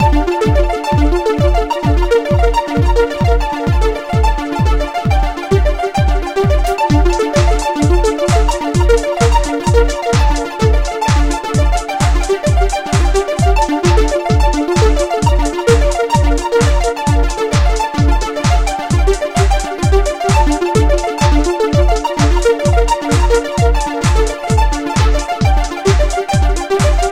Life-in-space-synth-loop
ambient, elctro, techno